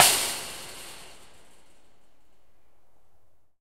hit - metallic - trailer - back of metal trailer 02
Hitting the back grate of a metal trailer with a wooden rod.